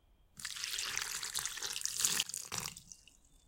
Watering the plant with cup 01
Watering the plant with cup. Recorded with a Blue Yeti.
liquid, water, watering